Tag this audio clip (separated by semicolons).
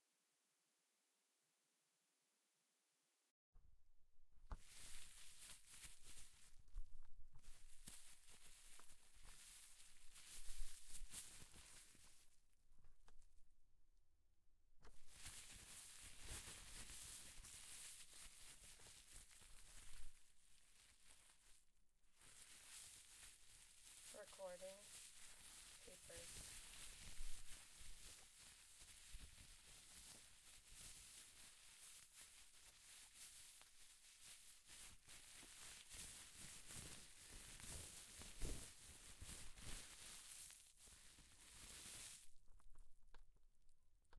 bag; crackle; plastic; wrap; wrapping